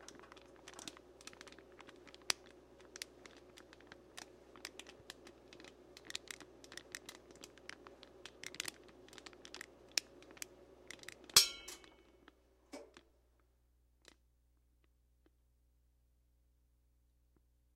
Popcorn Foley
Popcorn in the microwave foley made using a drier and a pop can.
crinkle, pop, popping, popcorn